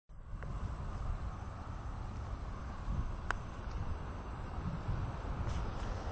bugs at night
Natural ambiance provided by
our insect friends.